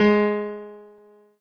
Piano ff 036